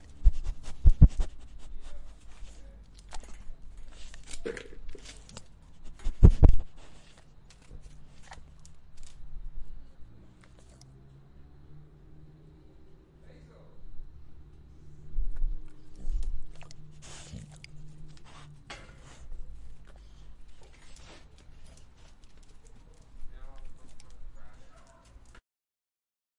OWI Dog sniffing and licking
A dog is always interested in something new and a recorder is definitely something new.
animal, breathing, close, Dog, dogs, lick, licking, OWI, sniff, sniffling